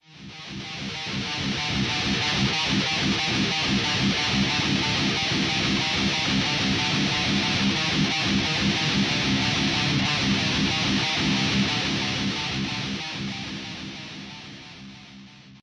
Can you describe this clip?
a little metal like riff recorded with audacity, a jackson dinky tuned in drop C, and a Line 6 Pod UX1.